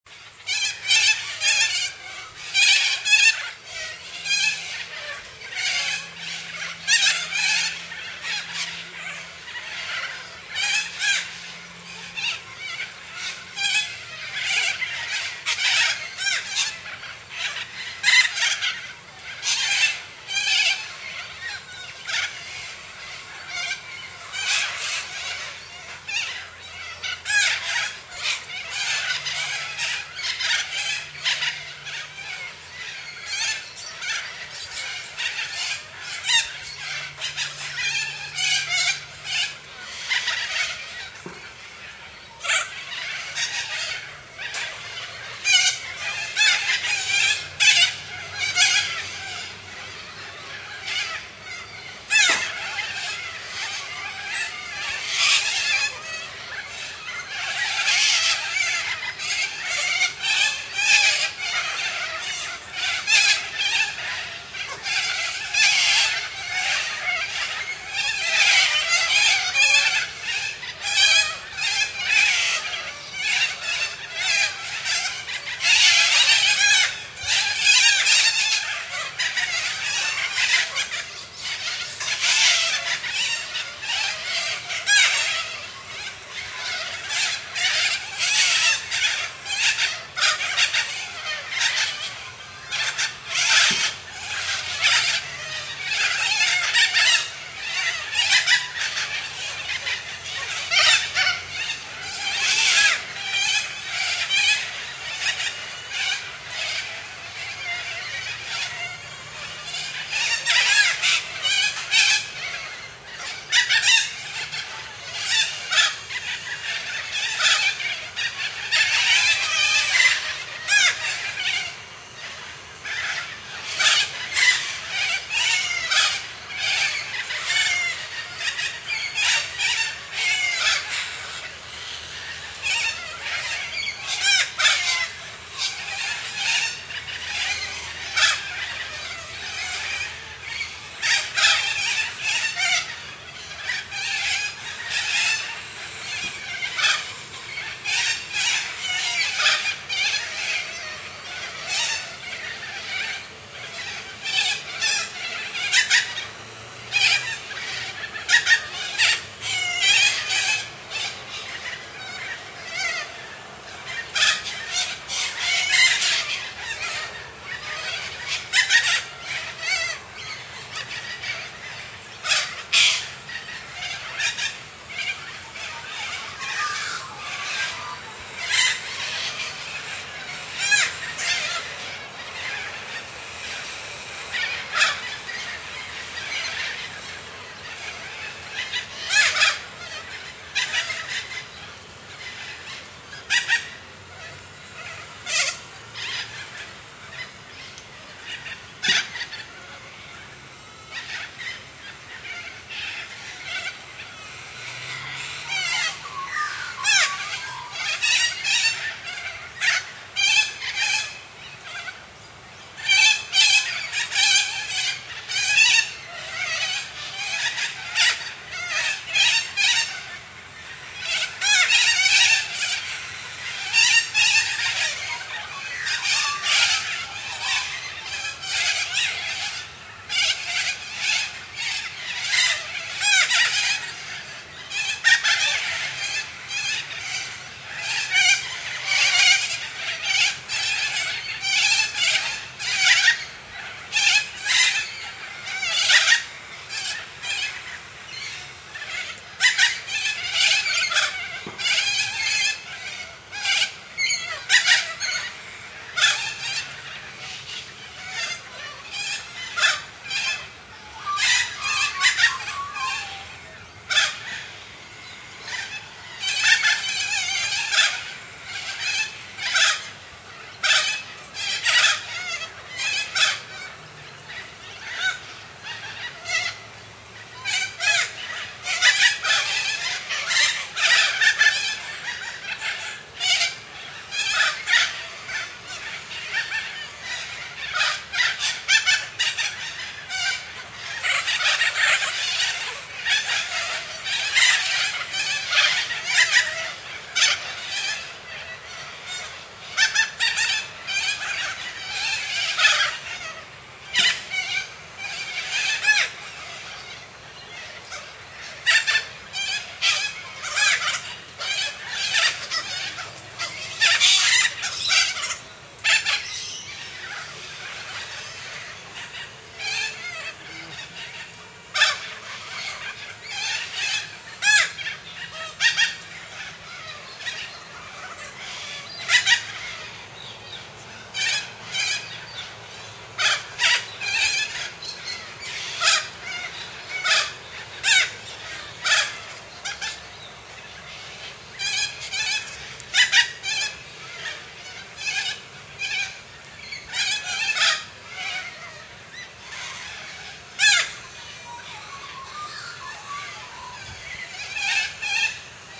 Roosting Corellas
All week, flocks of Corellas (a type of white cockatoo found in Australia) were filling the air and so was their screeching sound. They would frequently perch in trees and take off again, making everyone in the neighbourhood look outside their windows.